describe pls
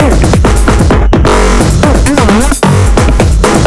"glitch loop processed with plugins"